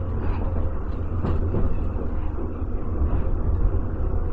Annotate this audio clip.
Budapest metro loop sound1
This is a loop sound of the Budapest Metro. The sound is denoised.
budapest, loop, metro